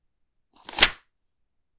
Turning a page of a book